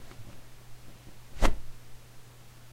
Some fight sounds I made...
combat, kick, fight, fist, leg, hit, punch, fighting